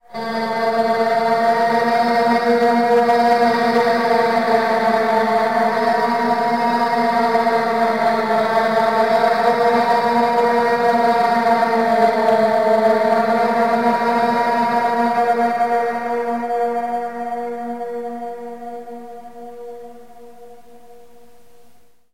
guitar drone 1
The sound is a guitar drone. Effects used: light distortion > EQ > pitch shifter/harmonizer on multi-effect > delay on a second multi-effect > MORE delay > vibrato > reverb.) Direct to soundcard, edited w/ compression and fading on the beginning/end to hide "loop clicks". Sound is a bit distorted in parts due to peaking from the reverb (I guess)... Meant to imitate shoegaze-style guitar drones... If I had a proper amp it might sound better!